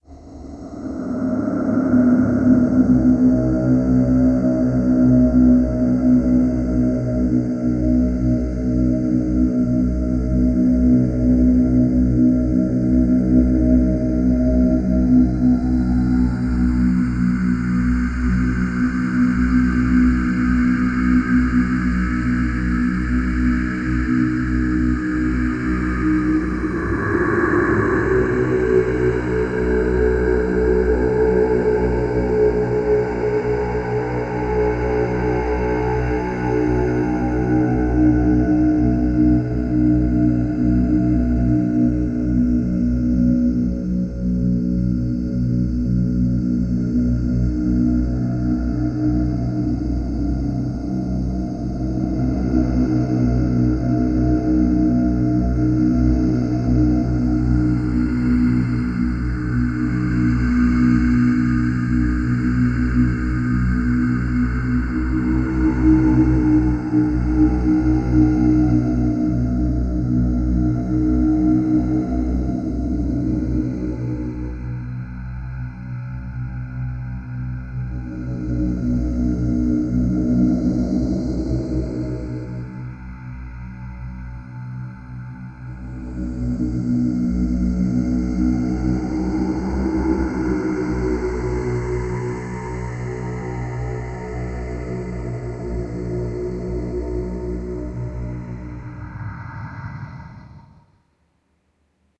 cw monk1
I made this from 10-12 different voices, stretch and reverb it. Just listen.
monk, synthesized, throat